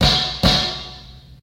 trash cymbal double hit
crash, cymbal, drums, hi-hat, mono, percussion